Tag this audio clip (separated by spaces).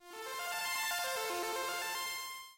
8bit arriving computer cool effect game kingdom loop melodic old original retro sample school sound tune